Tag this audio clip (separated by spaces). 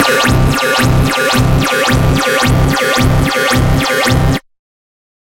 1-shot electronic synthetic Industrial wobble wah digital notes synthesizer processed LFO synth dubstep porn-core bass techno